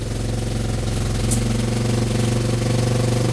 ATV Engine Idle
medium, Buzz, Factory, Industrial, high, Mechanical, machine, Machinery, Rev, engine, motor, low, electric